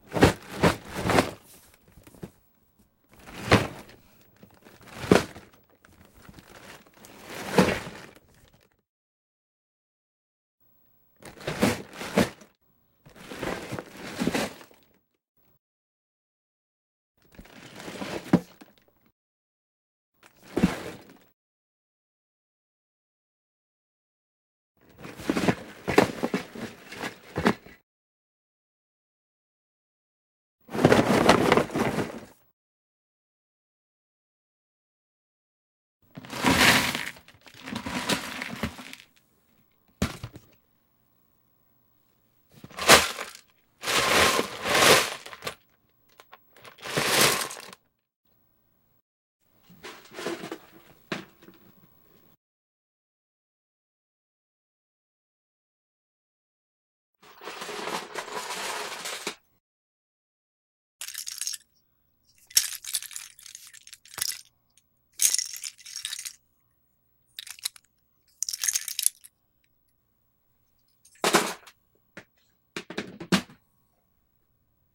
Toys Legos Shaken-Dropped by-JGrimm
Shaking a Lego Set box. Then dropping Legos and handling them.
RECORDED using AT4033 Mic in Adobe Audition 3 and then edited and cleaned up. Normalized to -.1
play, legos, shake, drop, toys, lego